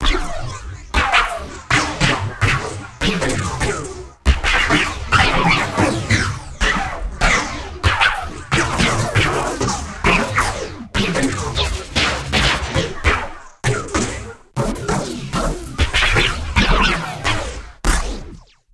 lazer gun battle
this gun battle will be GREAT for those fights that need that STRWRS touch. Made using fl studio plugins so load up and Keep Your Head Down
lazers, weapons